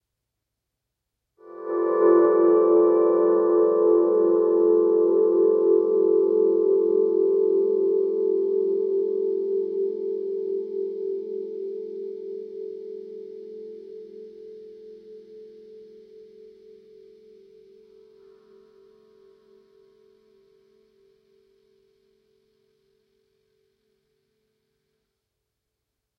A simple fade in using my volume knob.
Gear used:
Vox Tonelab SE, Ibanez UV777 packed with Seymour Duncan SH, Tascam DR-05.
clean, compressor, delay, dr-05, duncan, electric, F, guitar, harmonics, ibanez, Lydian, reverb, scale, se, seymour, sh, tascam, tonelab, uv777, vox